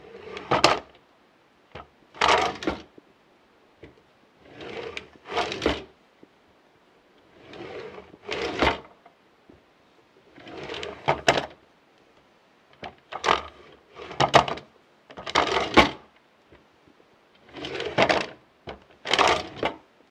Sound of a wooden cabinet drawer sliding open and close. Recorded on a Marantz PMD661 with a shotgun mic.